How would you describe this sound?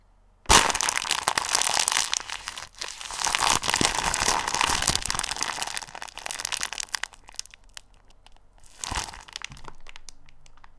Bones crunch human bone 3
the sound of someone's bones getting chrunch
bone, crunch, gore